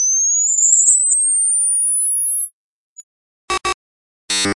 Completely made in Sony Sound Forge 10 with the FM tools.
Starts off with a sine 6,000 Hz to ~12,000 Hz in about three seconds to emulate a camera flash warming up.
For those who intend to use it as a part of a futuristic weapon in games, flashes, or movies, I also added a double beep as a "weapon ready" cue and final tone at the for an optional "locked on" which could also double as an error beep.